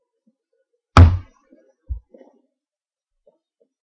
Foley- Face-Punch
Fight, Hit, Punch